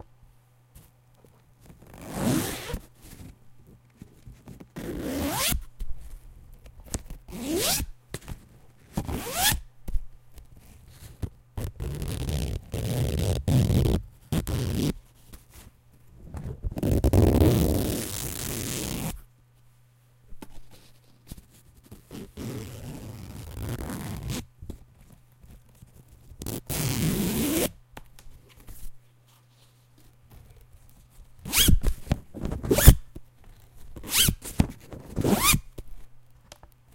noise of the zipper
AudioTechnica AT3035, Zoom H4n
crackle, zipper, zipper-noise